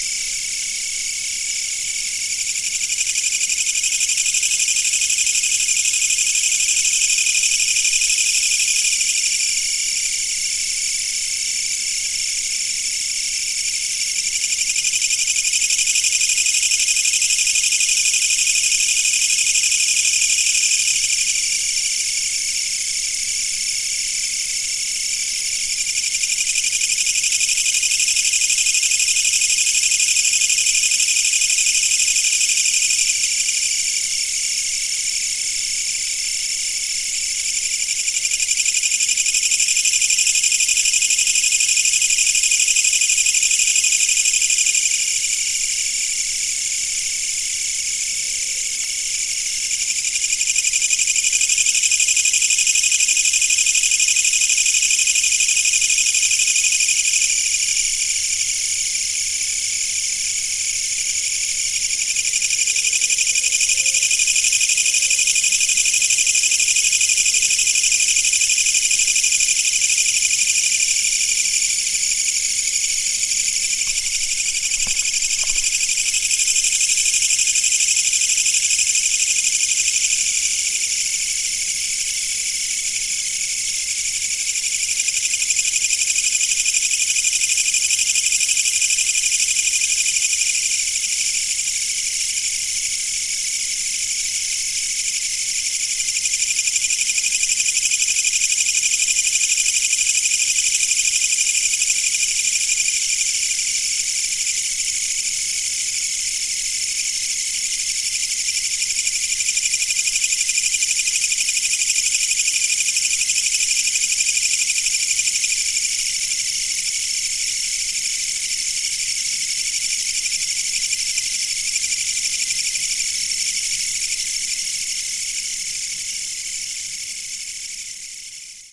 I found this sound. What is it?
autumn insects4
autumn, fall, field-recording, forest, insects, japan, japanese, nature